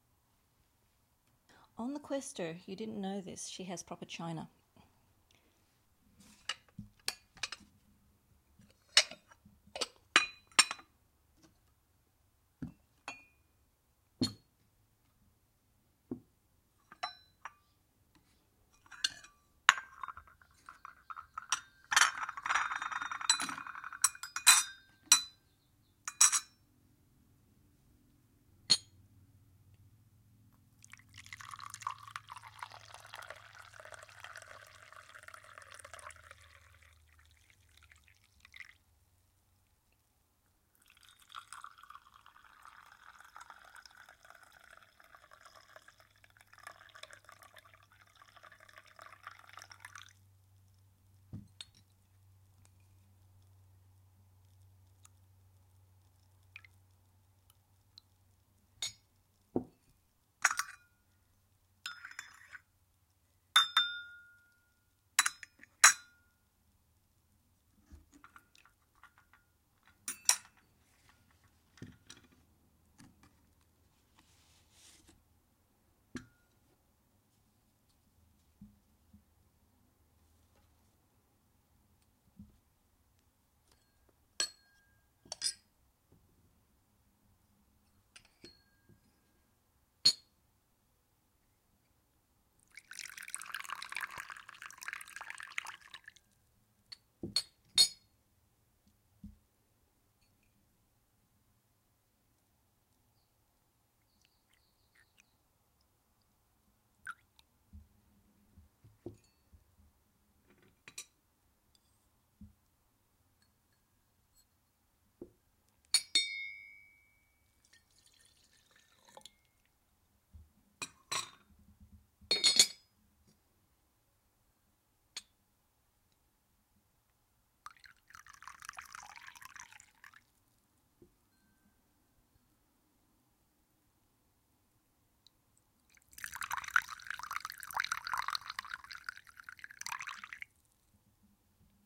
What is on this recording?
teatime serving tea carolyn
proper bone china tea service - clinks nicely
drink, tea, people, recording